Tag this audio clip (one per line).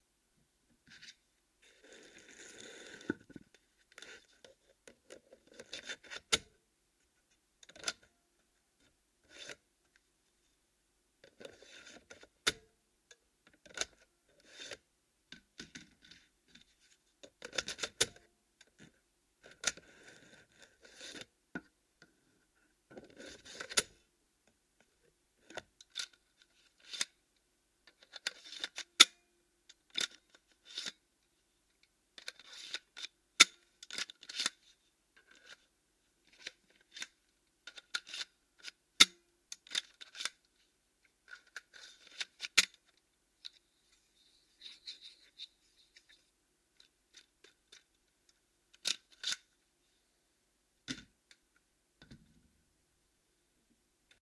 eject
insert
floppydrive